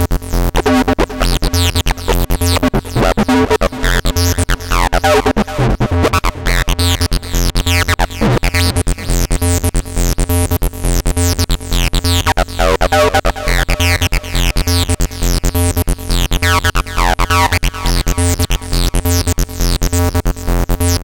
dope acid sounds